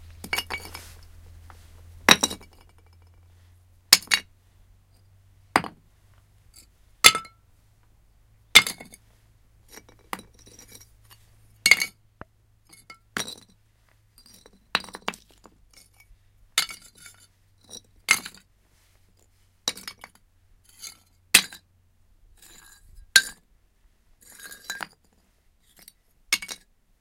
Falling pottery sharts. Recorded with a Zoom H1.